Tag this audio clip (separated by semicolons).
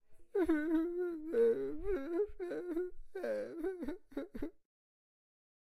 sound; home; foley